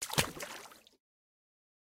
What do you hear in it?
water splash 2
A small splash in the water of a pool.
Since the Sony IC Recorder only records in mono, I layered 3 separate splashes sounds(1 left, 1 right, 1 center) to achieve a fake stereo sound. Processed in FL Studio's Edision.
small, splash, pool, water, sony-ic-recorder